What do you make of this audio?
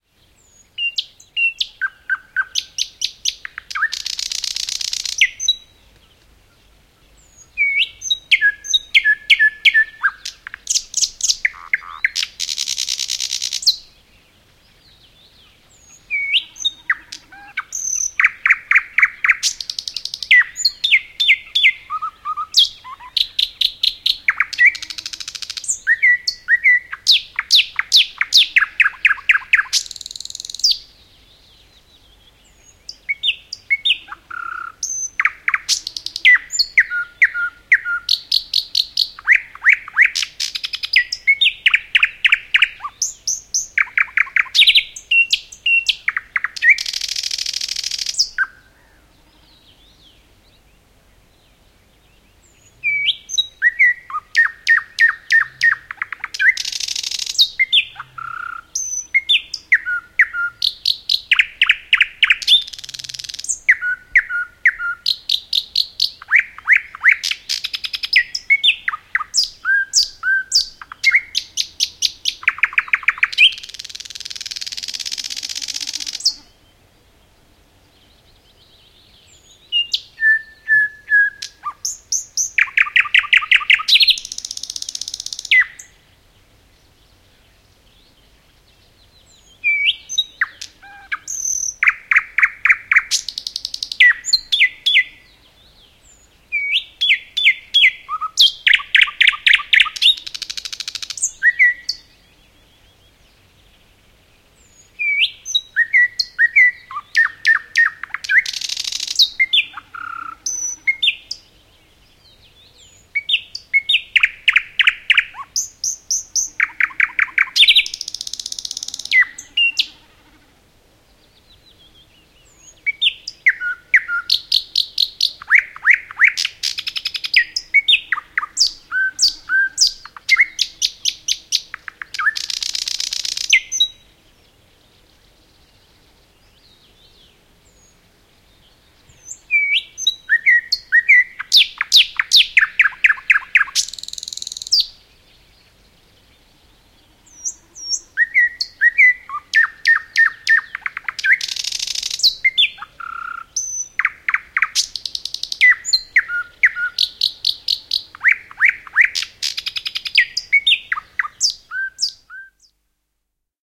Satakieli laulaa / Nightingale, song, thrush nightingale singing in the morning
Satakielen innokasta laulua aamulla. Taustalla hyvin vaimeita muita lintuja.
Paikka/Place: Suomi / Finland / Parikkala, Siikalahti
Aika/Date: 31.05.1978
Finnish-Broadcasting-Company, Linnut, Nature, Nightingale, Soundfx, Suomi, Tehosteet, Yle